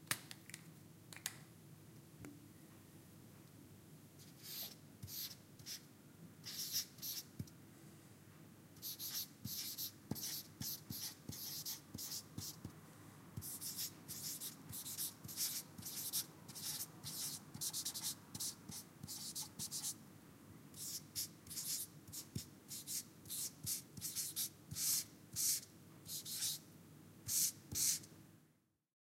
Writing on whiteboard
Writing on a whiteboard with a dry erase marker. The words written were very naughty and will never be mentioned.
Marker, whiteboard, soundfx, writing, foley, office